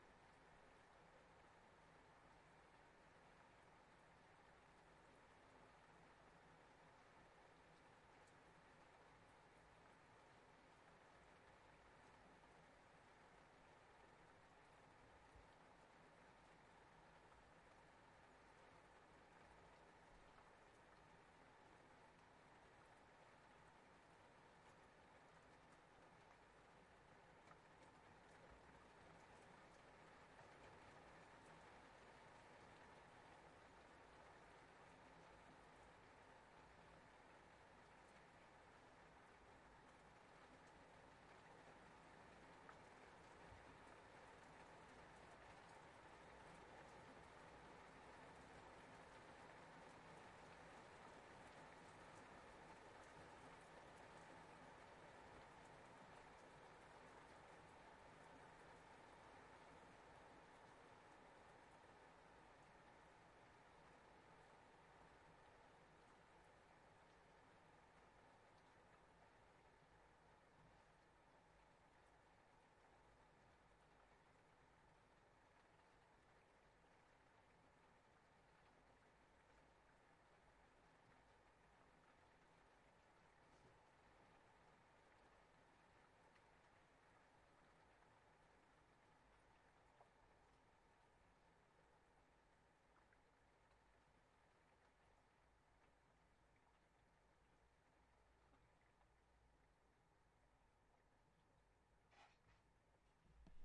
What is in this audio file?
A field recording of rain from my open bedroom window in the UK recorded on a Zoom H8
GSPARRY, Zoom H8